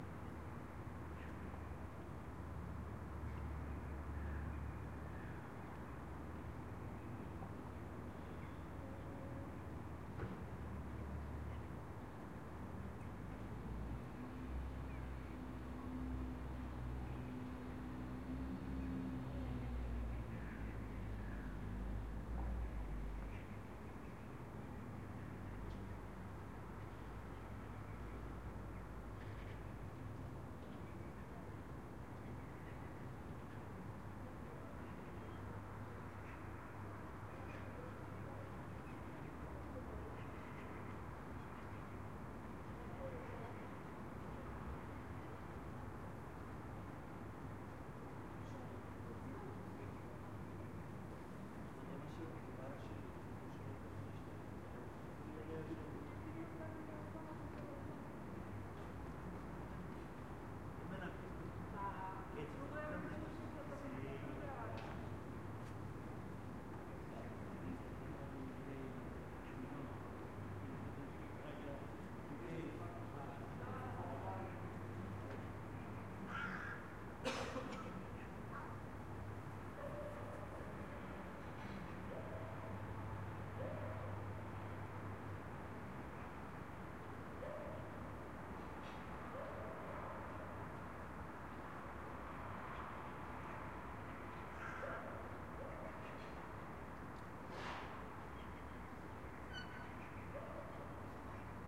Rooftop city neighbourhood sunset, distant draffic, residents activity, walla
city, field-recording, rooftop, traffic, walla